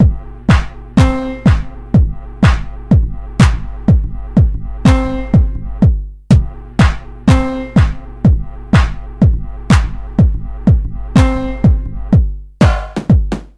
Asian Claps Intro 1
a bit of nepali taste is here in this English sounds. Hope you like it. I will try to create for you.
a, nice, nepal, nepali, mix, like, surely, beats, loop, will, ruben